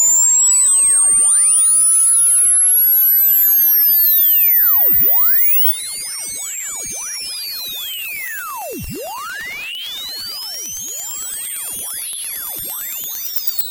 UFO Turbine
Loading an alien craft.
aircraft, alien, charge, craft, energy, load, noize, power, propulsion, turbine, ufo